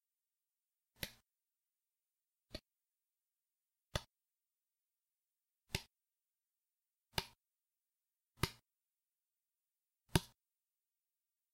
Wooden staff hitting hand
Sounds of a wooden staff/stick hitting the hand, or a hand grabbing/taking/stoping the object.
grab, hand, hit, palm, stick, stop, take, Wood, wooden